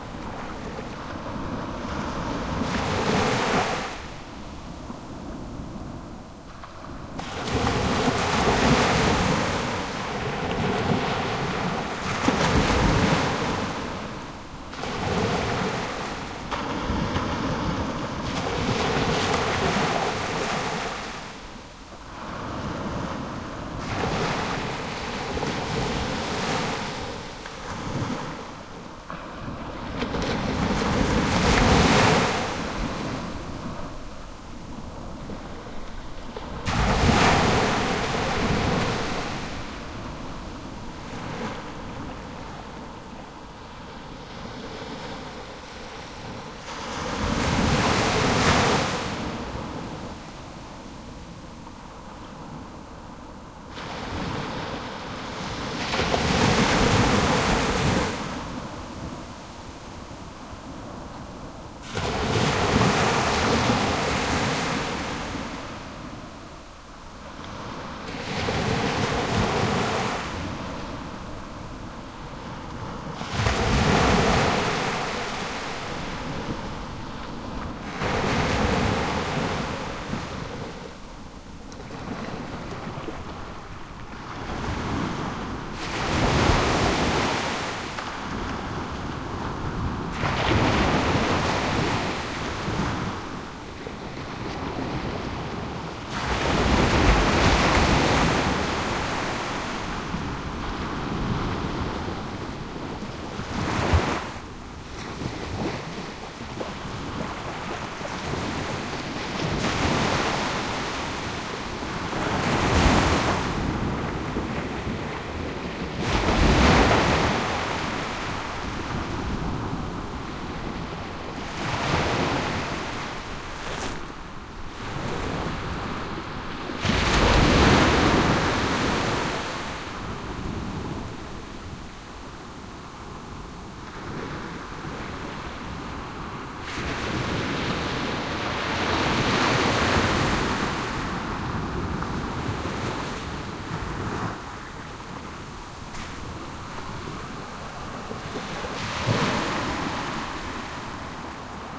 Waves breaking on a sandy beach, at medium distance. Recorded on Barra del Rompido Beach (Huelva province, S Spain) using Primo EM172 capsules inside widscreens, FEL Microphone Amplifier BMA2, PCM-M10 recorder.
beach, field-recording, surf, waves